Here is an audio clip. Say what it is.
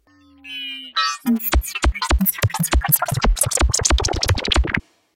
I collect all kinds of great glitch stuff, usually form odd artifacts I find in my recordings. Glitch Beats and Sound effects are awesome. I love this stuff.